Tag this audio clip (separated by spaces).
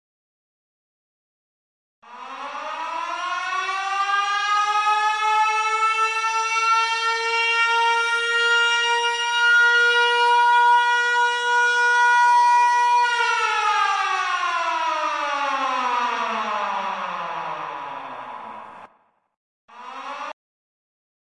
gfh kfh